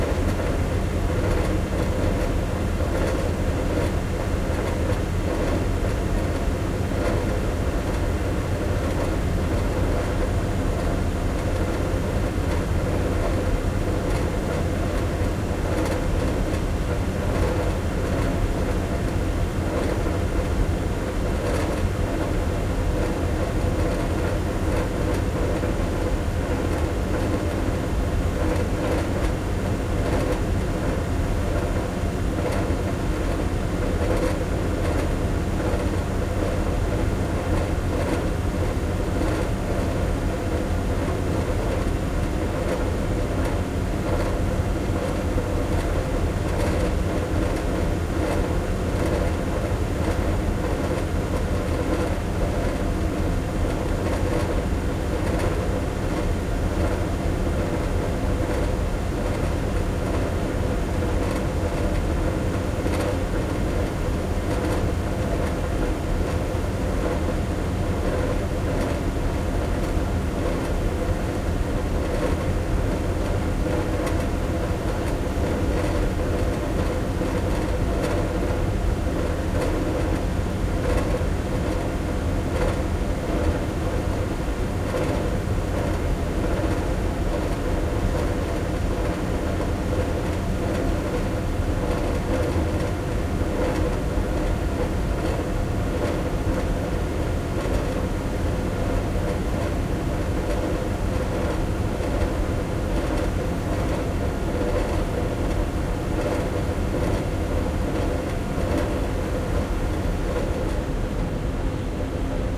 parking garage empty quiet ventilation fan close rattly1
rattly, garage, close, parking, empty, ventilation, quiet, fan